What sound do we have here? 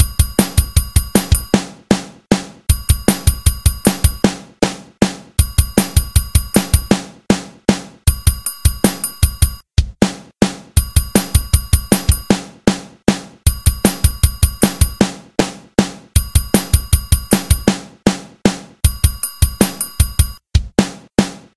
A drum pattern in 7/4 time. Decided to make an entire pack up. Any more patterns I do after these will go into a separate drum patterns pack.
7, 7-8, 8, drum, full, kit, pattern